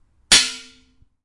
Knife Hit Pan Filled With Water 7
clang, hit, impact, knife, metal, metallic, pan, strike